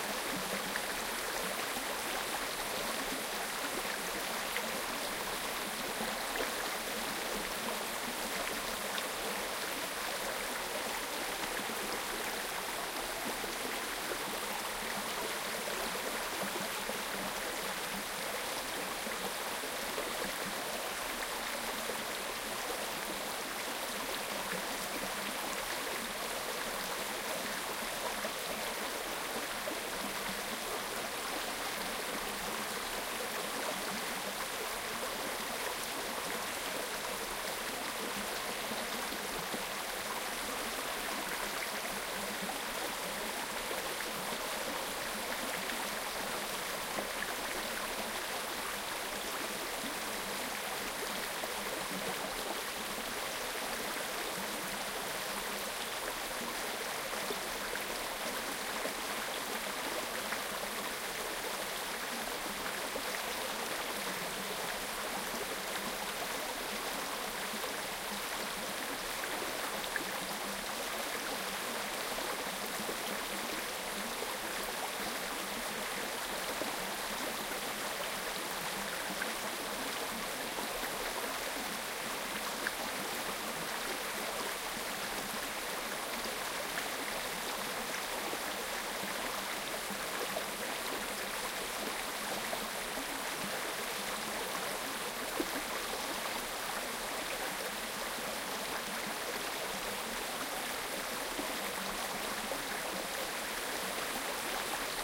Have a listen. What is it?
Bubbling Stream Tasmania 3 2013
Recording of stream sounds using an Edirol R09HR with Sound Professionals Binaural mics positioned on trees to create a stereo baffle.
Bubbling, Falls, nature, Russell, Splash, Stream, Water